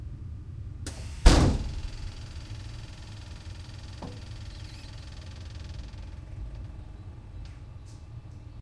Traindoor open
Recording of a pneumatic traindoor closing in a dutch train.
door, open, pneumatic, train